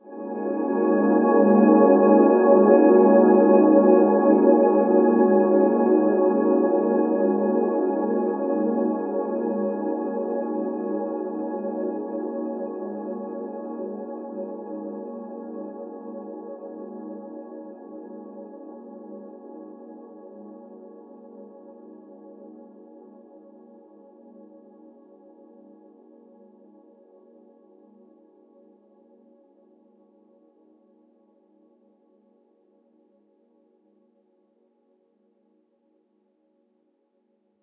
yolk bangin FFT
Spectral synth chord made with SunVox's SpectraVoice + Reverb.
additive, ambient, atmospheric, calm, chill, chord, cool, digital, dreamy, electronic, ethereal, fft, glow, long-reverb-tail, lush, magic, mystical, pad, pretty, spectral, synth, warm, wash